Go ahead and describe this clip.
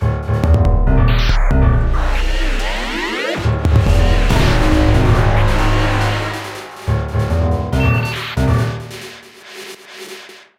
Futuristic Soundscape 2
Piano, strings, futuristic sound effects. Haunting, threatening, spooky.
futureistic, futuristic, spooky, threatning, piano, sound-effects, spook, approaching, star, evil, stars, future, spacy, threatening, fx, beat, haunting, idm, glitch, space, planetary, threat, haunted, war, dark